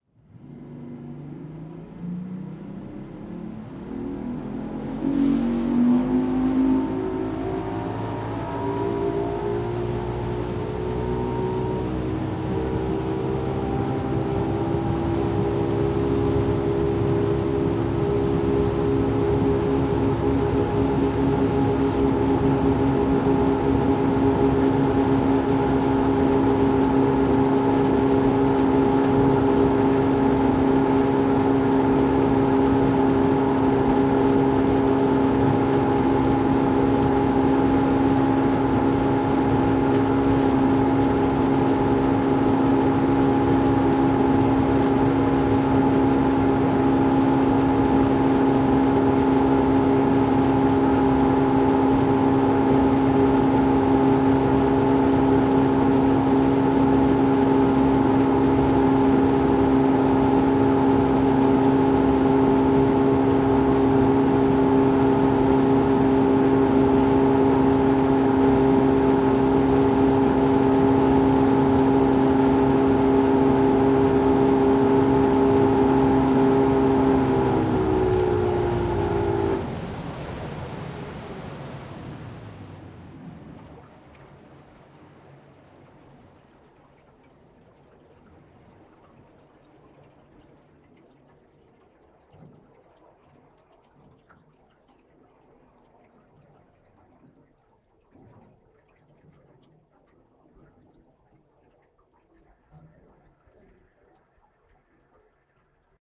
boat in water goin